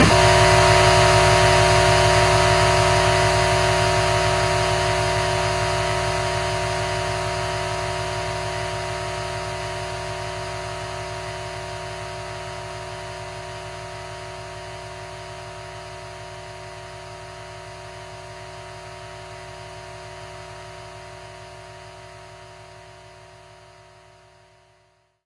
PPG 001 Dissonant Weirdness C1
This sample is part of the "PPG
MULTISAMPLE 001 Dissonant Weirdness" sample pack. It is an experimental
dissonant chord sound with a lot of internal tension in it, suitable
for experimental music. The sound has a very short attack and a long
release (25 seconds!). At the start of the sound there is a short
impulse sound that stops very quick and changes into a slowly fading
away chord. In the sample pack there are 16 samples evenly spread
across 5 octaves (C1 till C6). The note in the sample name (C, E or G#)
does not indicate the pitch of the sound but the key on my keyboard.
The sound was created on the PPG VSTi. After that normalising and fades where applied within Cubase SX.